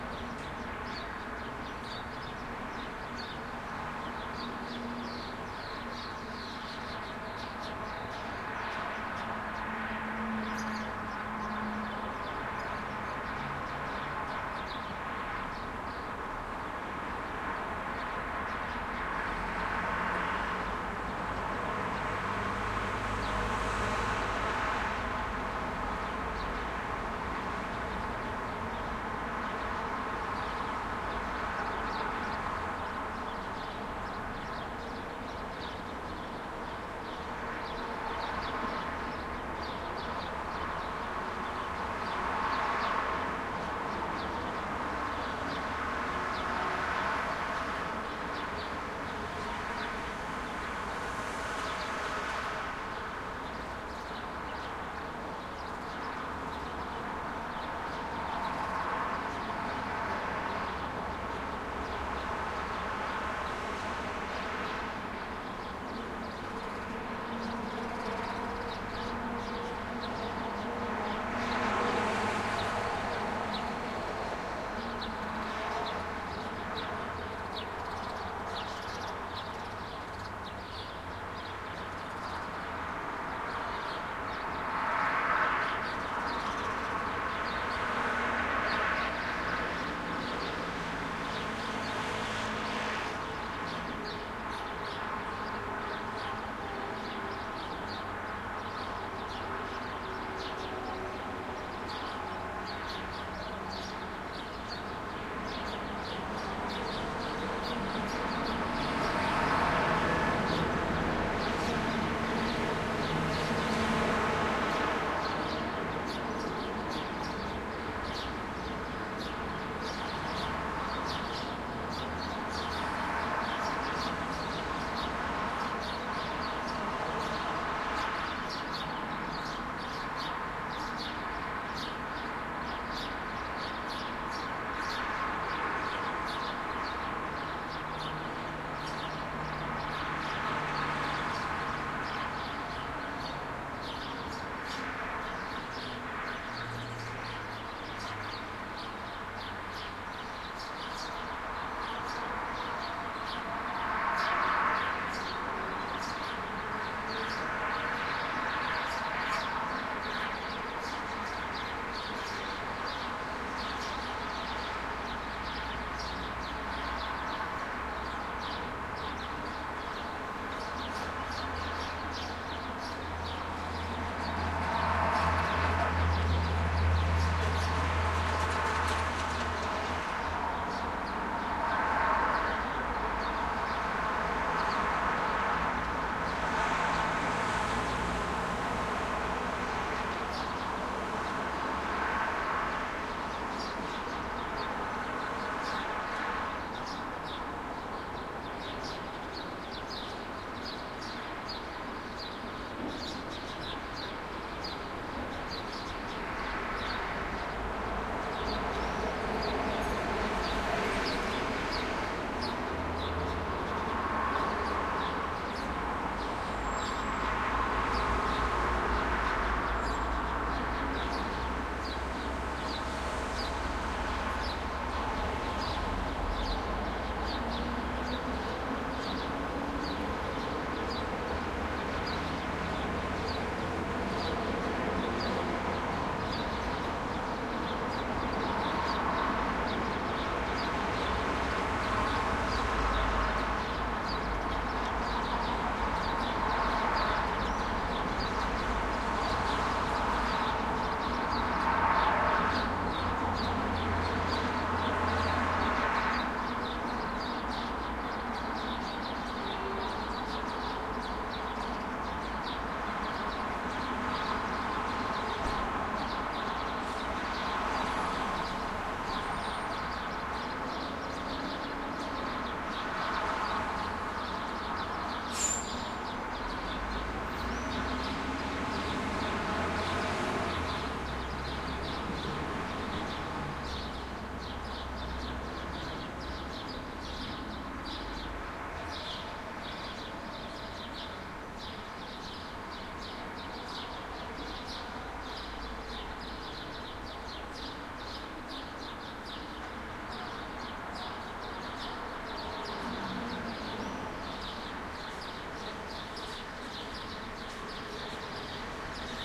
City ambience Cyprus Limassol 7A.M. hotel balcony XY mic
Recording made on 7A.M. at the hotel balcony on a fourth floor, facing outside towards the B1 road of Limassol, Cyprus.
Made with Roland R-26 built-in XY mics.